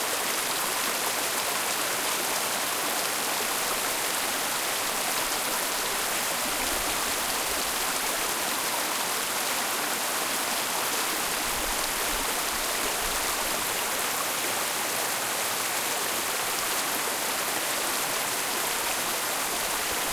The sound of a small stream/brook at Big Sur Pfeiffer Beach Park.